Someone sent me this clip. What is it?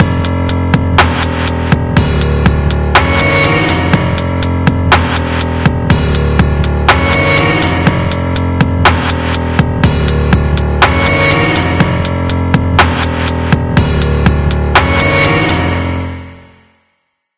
Dark Hip Hop Loop
An alternative hip hop loop I made in FL Mobile.
Alternative, Alt-Rap, Bass, Beat, Drum-Loop, Hip-Hop, Rap, Trap